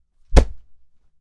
good kick in the head sound
A kick in the head sound made by layering up 2 mic stands hitting different pillows.
body, head, hit, kick, pillow, punch, thwak